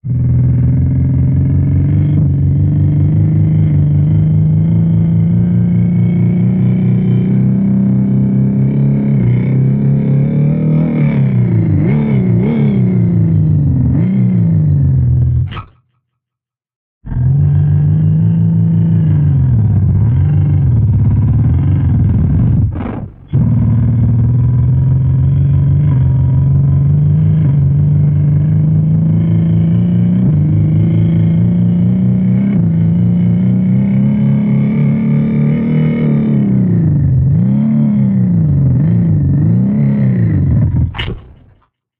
Car/Motorcycle engine #2
Car/Motorcycle engine running. Lower in pitch. Sounds like an engine running while the vehicle is moving, not stationary.